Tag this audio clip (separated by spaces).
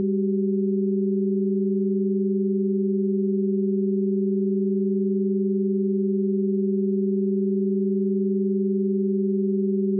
ratio signal test pythagorean chord